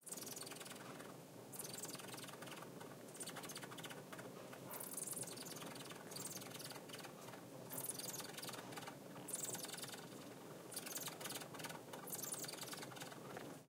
foley,fireplace,heated,metal,expanding,friction,squeak,sizzle,pressure 01 M10
Sound of a heated metal fireplace sizzling and squeaking after being hit. Can remind a bit of styrofoam or dry ice.
heated, foley, expanding, squeak, design, pressure, metal